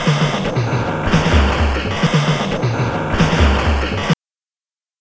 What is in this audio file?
28805 clkk rudemix
arythmic, drums, industrial, lo-fi, loop, noise, remix